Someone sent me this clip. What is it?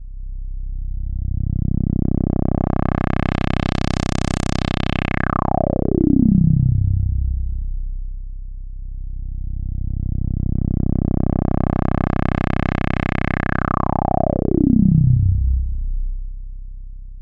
APC-BassSweeps2
Atari-Punk-Console, diy, noise, drone, APC, glitch, Lo-Fi